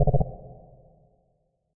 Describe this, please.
Square wave with AM and a low-pass filter.